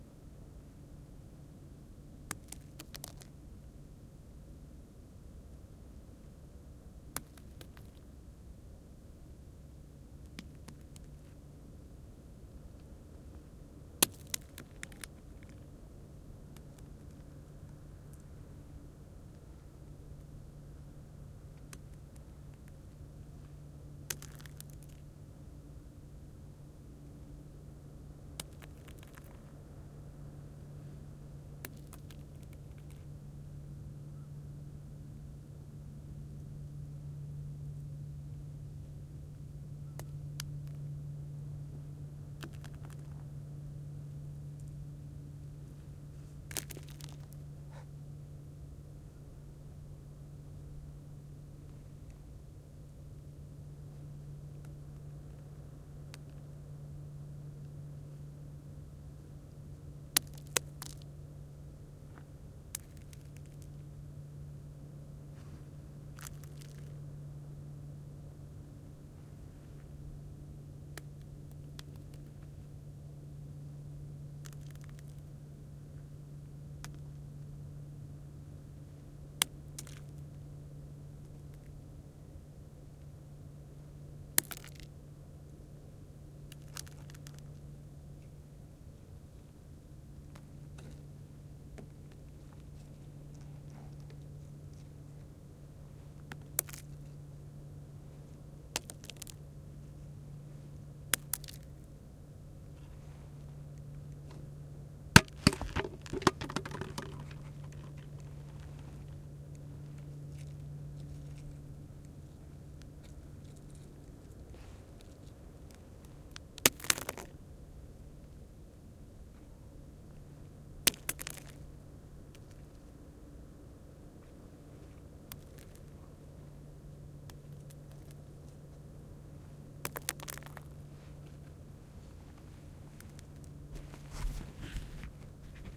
Stones tossed in the desert
Stones, from medium to small, being tossed in front of my micrphones in the middle of the night in the chilean pampa or desert.
Recorded on a MixPre6 with LOM Uši microphones. Gave it a +6dB bump with ProTools.
desert, dirt, drop, gravel, hit, impact, stoned, thud, toss, usi-pro